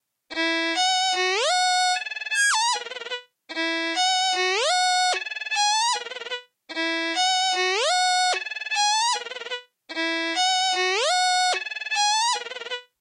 Violin MIDI passage
Small violin passage recorded from Guitar Pro
violin, Guitarpro, MIDI, pitched, high